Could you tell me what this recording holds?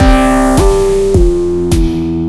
Minimal Electro Pop Loop
crunchy electro pop loop
electro, techno